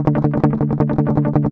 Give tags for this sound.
guitar
loop
d
clean
drop-d
les-paul
strumming
power-chord
160bpm
muted

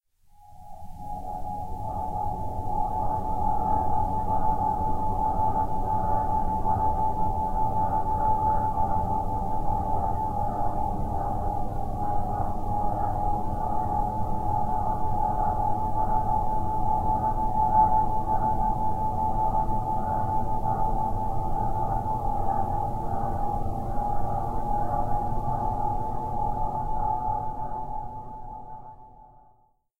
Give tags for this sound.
sci-fi
ufo
retro
alien

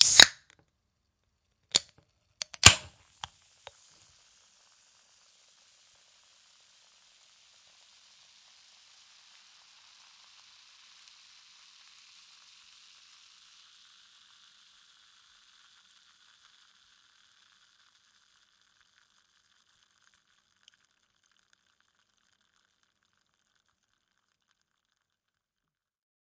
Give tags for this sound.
coke-can
opening-a-can
opening-a-drinks-can
opening-can
opening-soda-can
soda-can

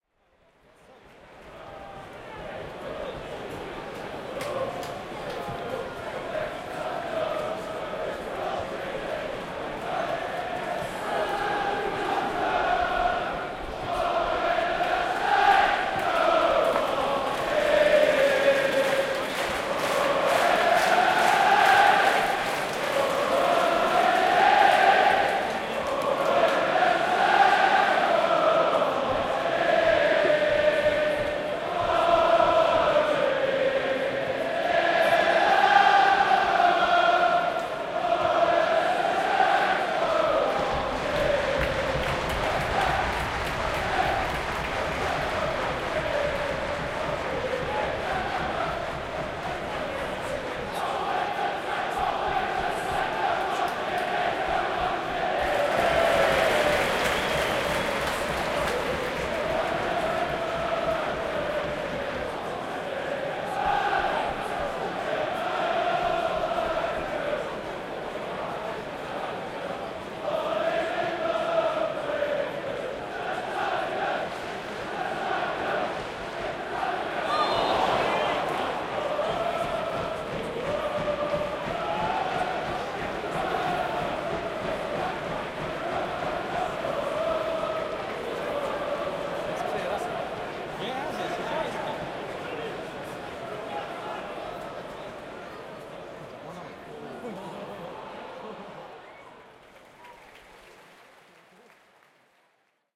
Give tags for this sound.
Football-Crowd
Boo
Saints-go-marching-in
Cheer
Football
Stadium
Southampton-FC
Large-Crowd

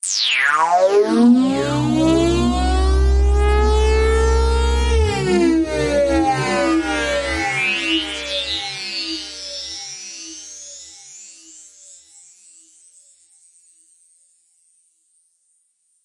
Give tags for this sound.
fx
synth
sweep
acid
electronic
sfx

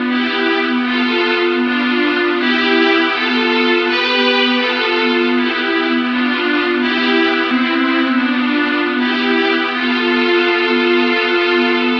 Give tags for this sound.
chords
strings

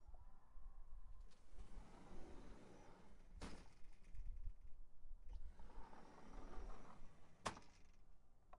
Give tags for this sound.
door
opening
open
Automatic

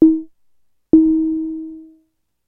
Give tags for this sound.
analog
cowbell
korg
mono
poly

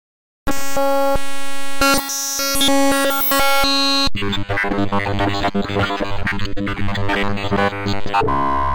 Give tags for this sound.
noise
mangle

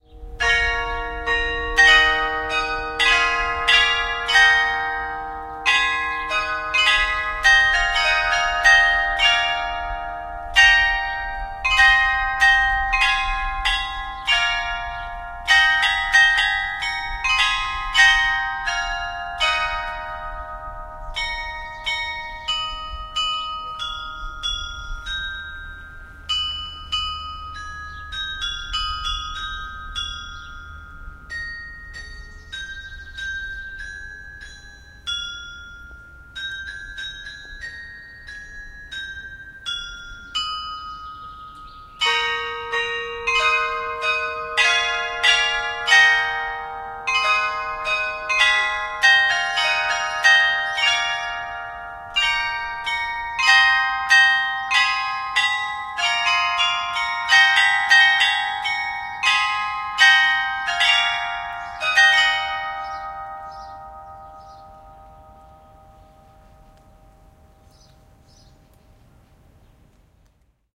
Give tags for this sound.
bells
belltower
churchbells

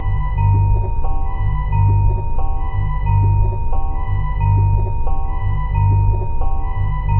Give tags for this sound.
novelty
creepy
experimental
90bpm
scary
weird
loop
ambient

